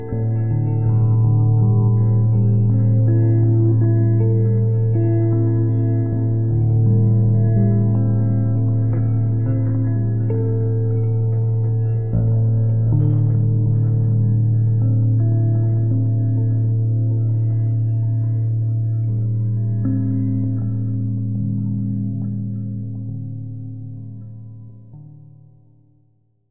Zero G Guitar v2
ambient, music